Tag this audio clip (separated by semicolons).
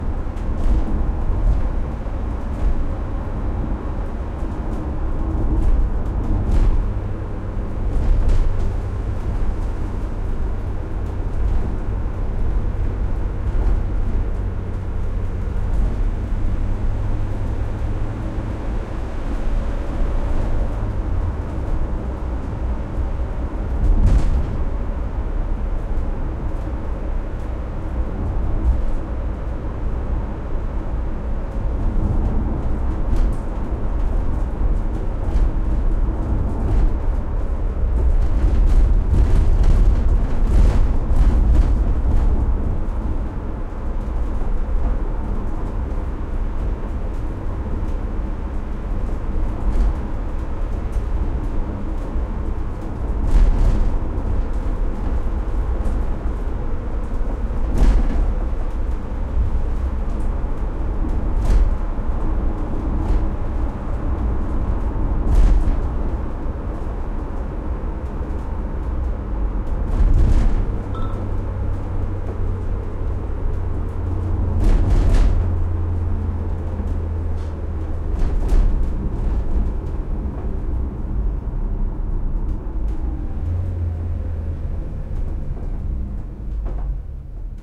street; city; bus; road; truck; master; van; engine; vehicle; cabin; diesel; automobile; interior; drive; bad; renault